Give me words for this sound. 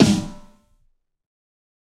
Fat Snare EASY 041
This is The Fat Snare of God expanded, improved, and played with rubber sticks. there are more softer hits, for a better feeling at fills.
drum, fat, god, kit, realistic, rubber, snare, sticks